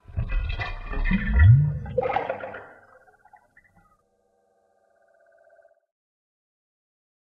A digital imaginary bubble
short, strange, aqua, sound-design, water, unreal, liquid, Fx, filter, bubble